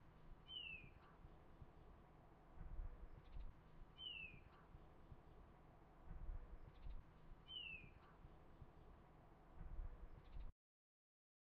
small bird singing